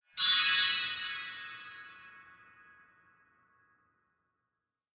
Audio of a bright and twinkly power-up sound effect of sorts. Could also be used in other UI elements. I created it by loading one of my wind chime samples into Kontakt 5 and playing an arpeggiated major chord in a high register, with some reverberation.
An example of how you might credit is by putting this in the description/credits:
The sound was created using Kontakt 5 and Cubase 7 on 11th November 2017.